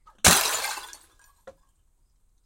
Bottle Smash FF178
1 medium pitch, loud bottle smash, hammer, liquid sloshing
bottle-breaking, medium-pitch